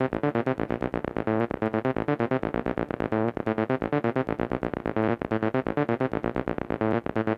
TB303 Made with Acid machine 130BPM
303, acid, bassline, electronic, loop, tb303, tb303squarewave